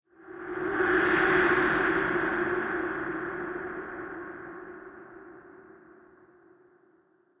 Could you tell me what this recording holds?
Perfect sound to give someone the chills! Created with AudaCity